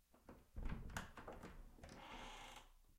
Door Handle 3
Door handle turning, 3 mics: 3000B, SM57, SM58